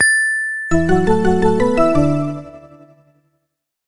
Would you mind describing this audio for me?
Timer complete #2: A ringing bell, followed by a short synth melody.